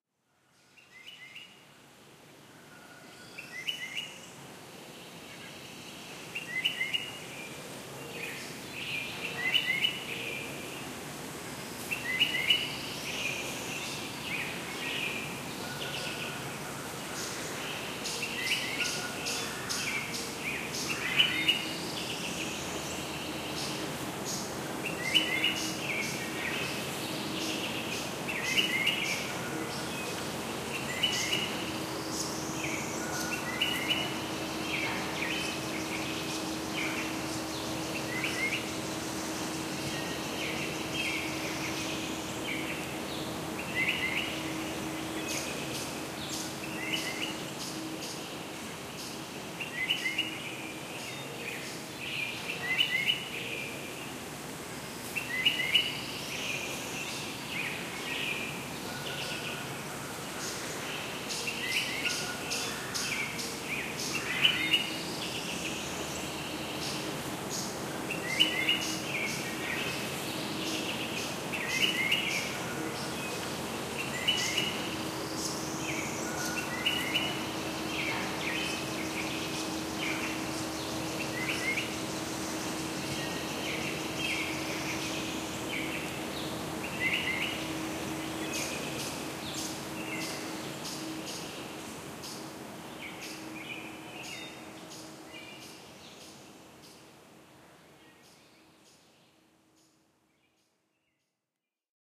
birds with wind through trees
heavy stream with birds
wind, breeze, birds, park, forest, nature, trees, field-recording